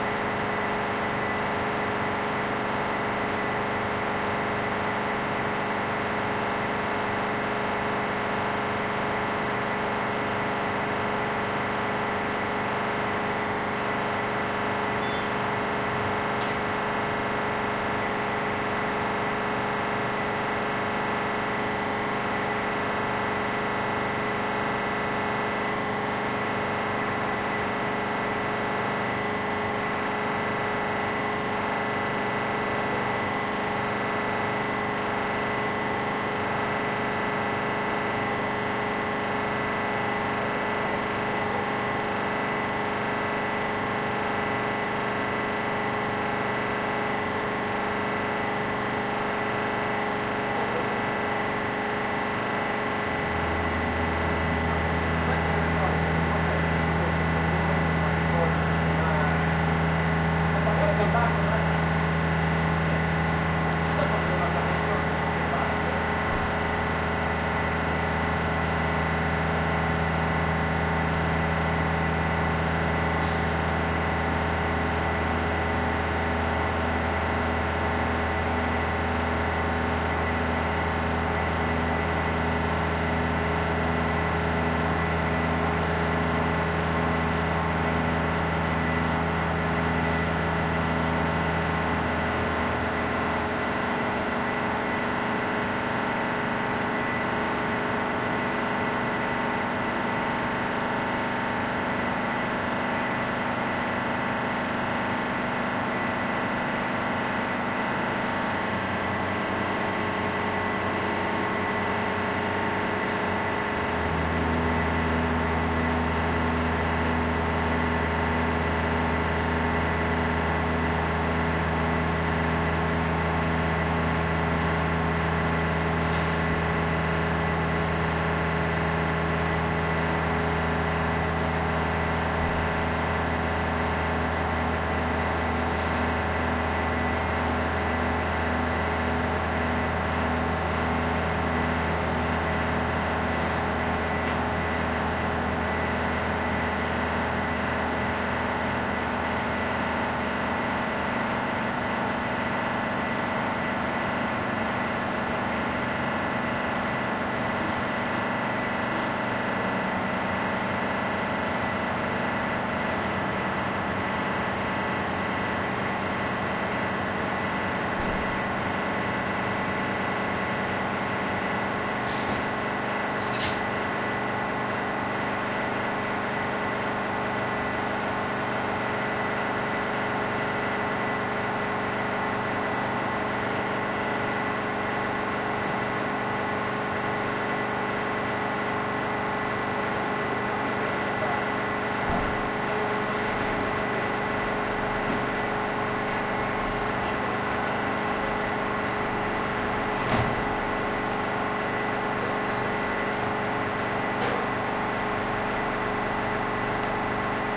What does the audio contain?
RomaBenzinaioGas viaPomonte
Gas station in via Pomonte, Rome, Italy. Recorded with a Zoom H2 near the pressure machine.